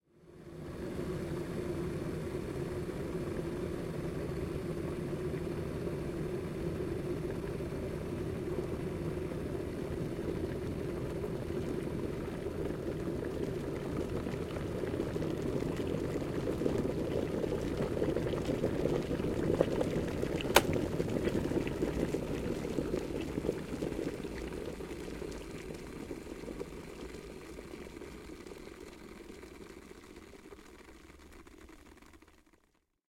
01 Boiling Water
Water being boiled in a kettle